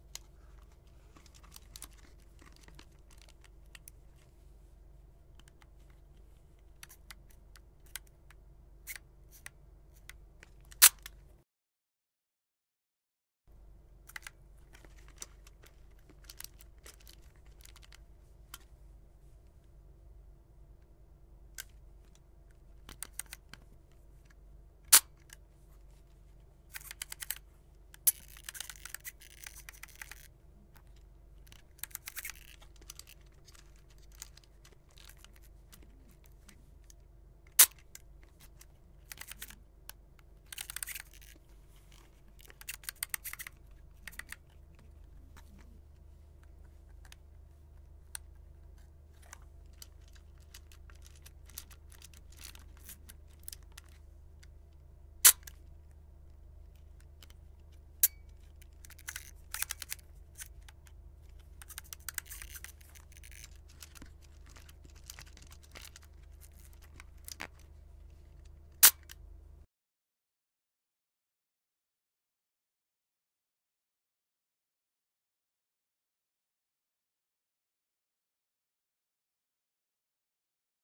Vintage camera clicks and wind
Mono sound of a vintage camera in action
35mm-camera, camera-rewind, Vintage-camera, camera-wind, film-camera, camera-shutter, rolliflex